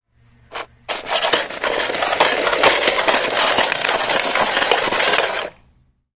mySound TBB Gyulshah
Sounds from objects that are beloved to the participant pupils at the Toverberg school, Ghent
The source of the sounds has to be guessed, enjoy.
belgium, cityrings, toverberg